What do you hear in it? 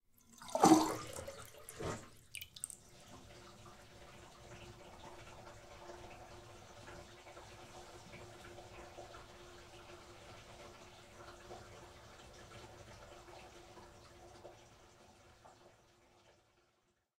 04 draining sink
mono, bathroom, Panska, water, Czech, drain, CZ, sink